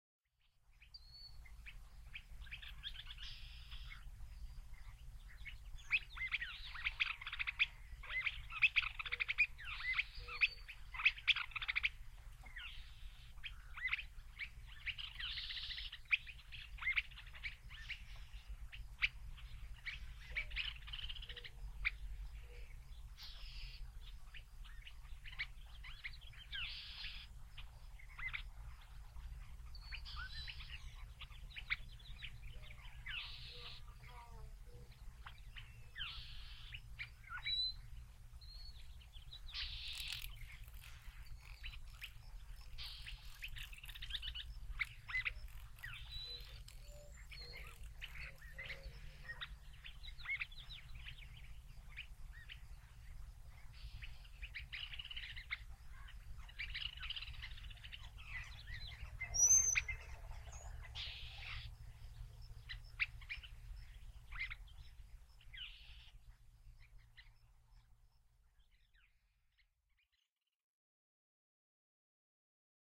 After sleeping in a camper, this was a great sound to wake to beside Millerton Lake, California.